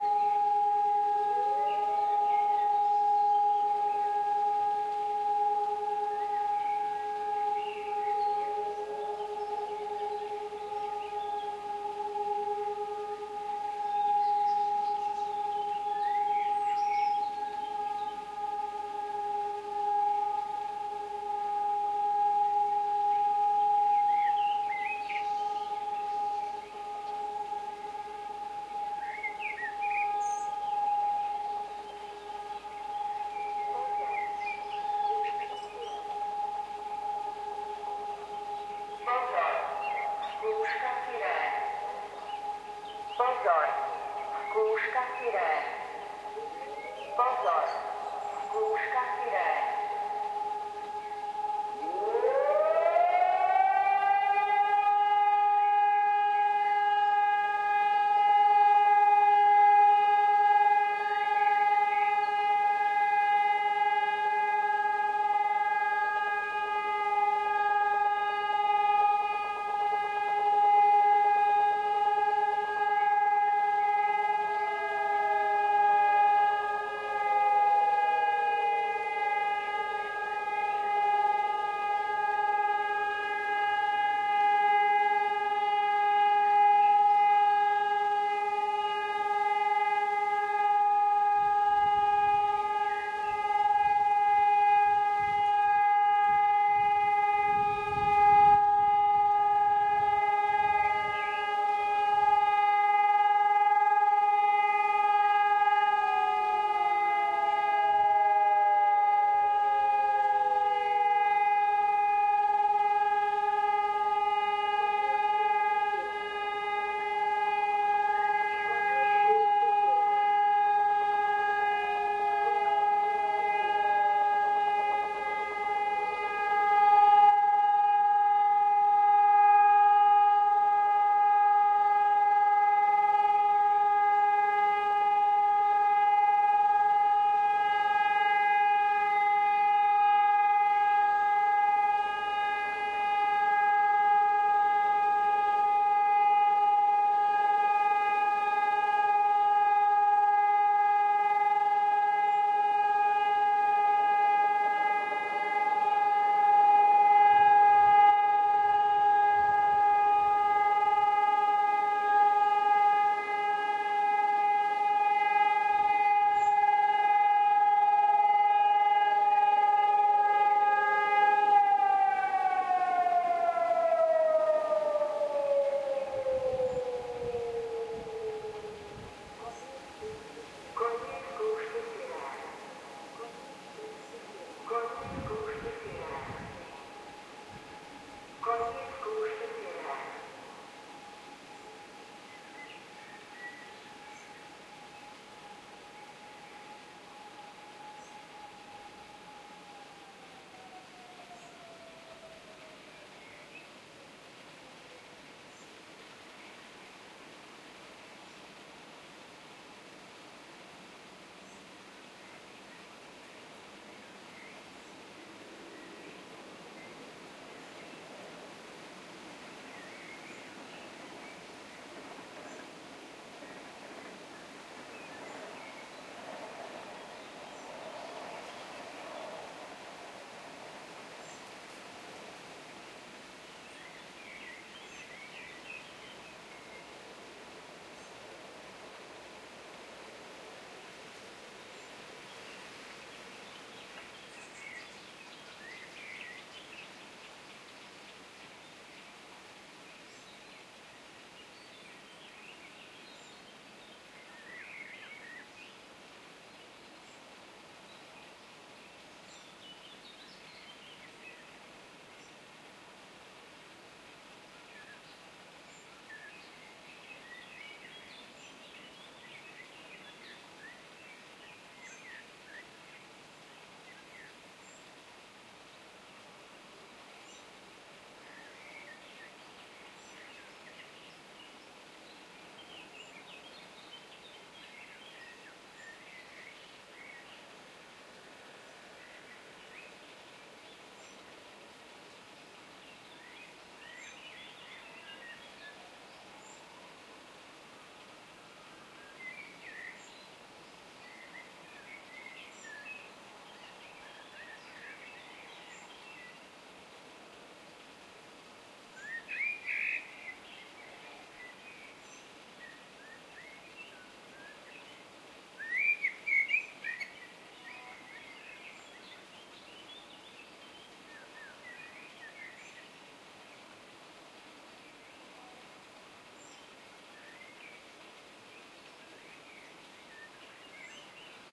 Loudest test of Sirenes this year in Bratislava, Slovakia as I heard through opened window. Recording is slightly windy. I used Nagra Ares-M with red mic.